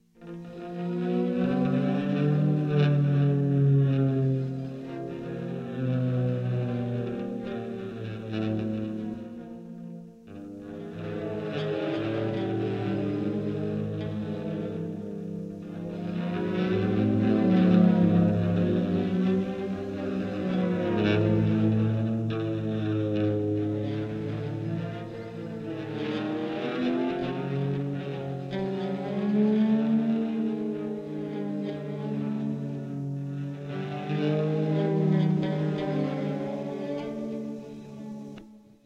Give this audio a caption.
two tracks of guitar sliding sounds, with lots of panning. I was looking for a 'drunk in bed' effect